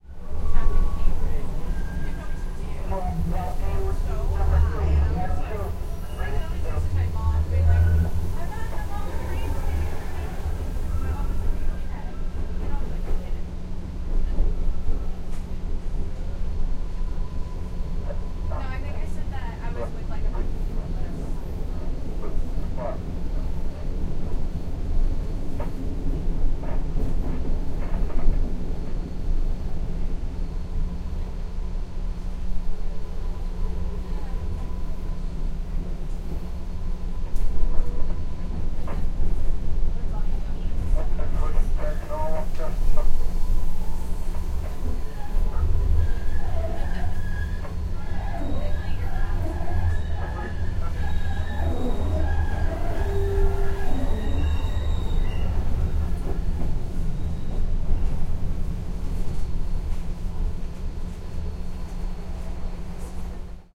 Interior MTA subway train ambience during ride